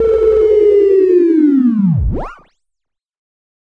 Attack Zound-12
Similar to "Attack Zound-02" but with a long decay and a strange sound effect at the end of the decay. This sound was created using the Waldorf Attack VSTi within Cubase SX.
electronic, soundeffect